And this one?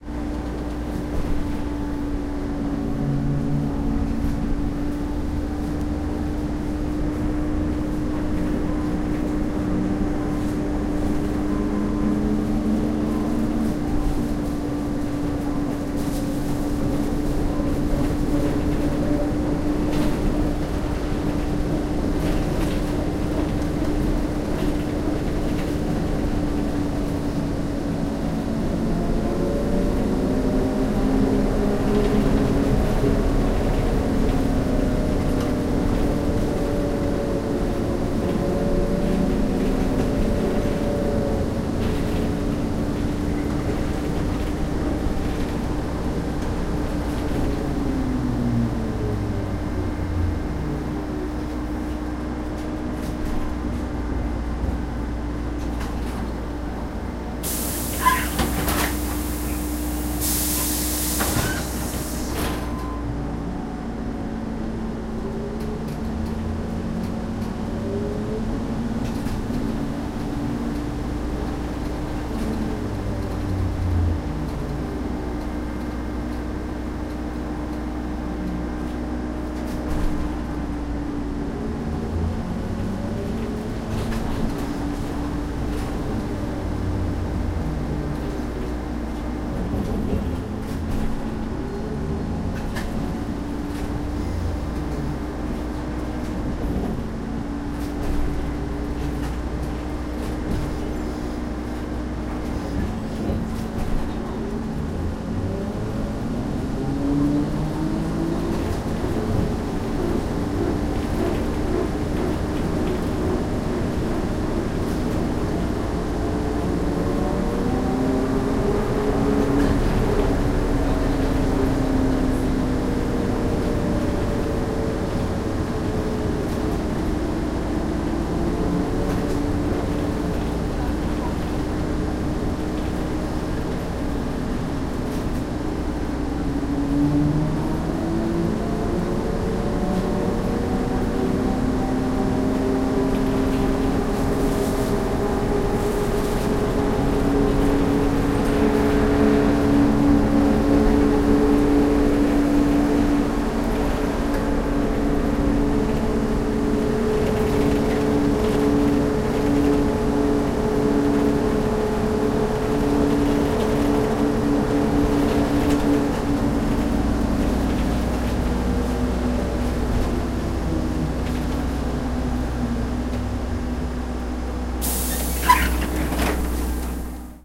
My evening trip in an old trolleybus.
amdient, traffic, city